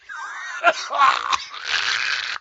I happened to record a bad cough when I had covid.
--For quick accreditation, copy-paste the following into your works cited--